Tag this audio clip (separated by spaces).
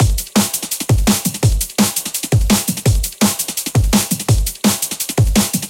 bass break breakbeat dnb drum spyre